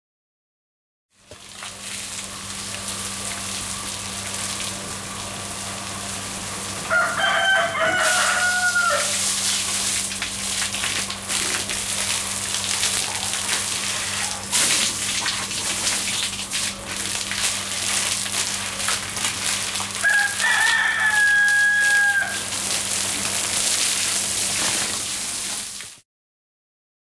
Watering the Plants with water pump sound
Watering plants with a hose pipe with water pump sound.
Farm, Field-Recording, Watering-Plants